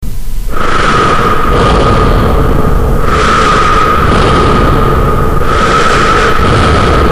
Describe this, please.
Distorted Breathing
This sound is an extremely distorted sample of breathing suitable for use in horror soundscapes. It was created by taking the sound of breathing and feeding it through multiple filters in Audacity. The filter sequence used is as follows: reverb, volume boost, static, phaser, bass boost, Barry's satan maximizer, amplifier.
loud, breathing, breath, horror, distorted